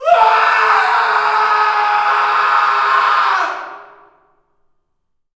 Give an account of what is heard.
Male screaming in a reverberant hall.
Recorded with:
Zoom H4n